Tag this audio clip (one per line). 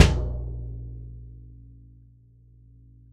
1-shot
drum
velocity
multisample